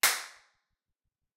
small-cave haha-tonka-IR
small cave IR reverb
IR, small, impulse-response, cave, clap